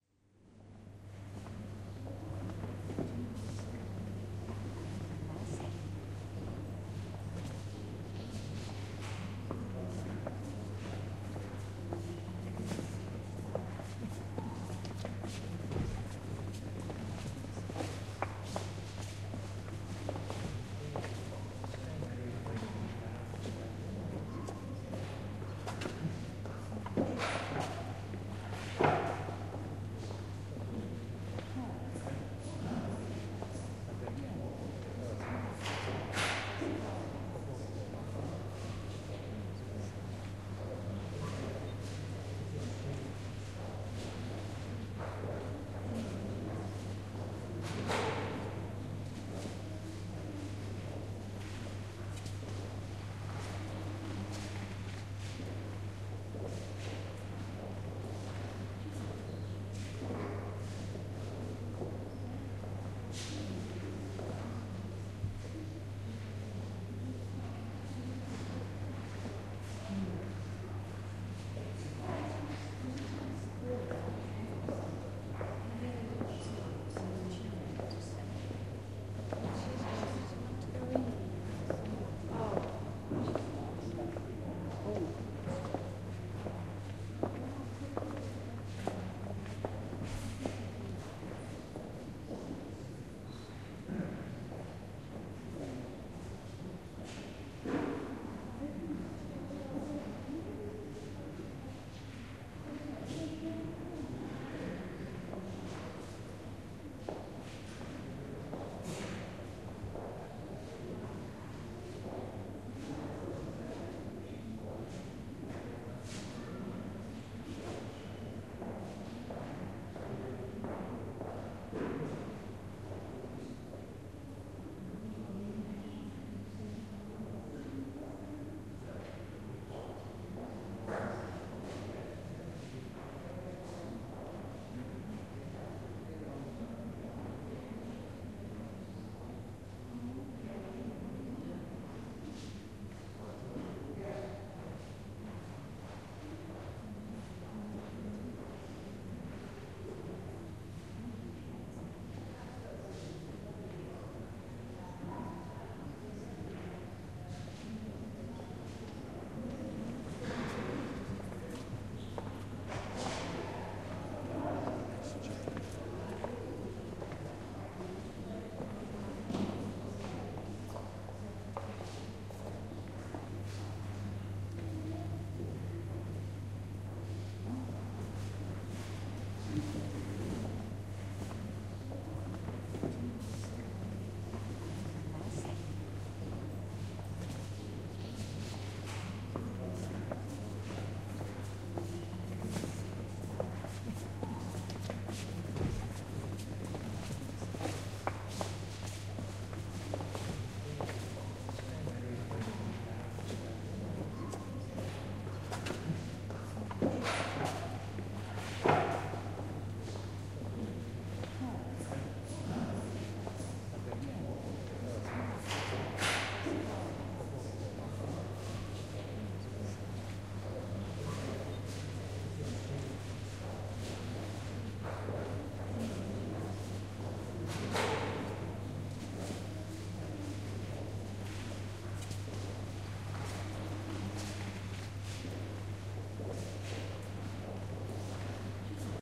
The ambient sound of a cathedral busy with visitors.